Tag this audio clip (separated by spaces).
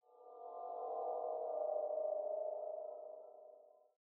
effect fx sound-effect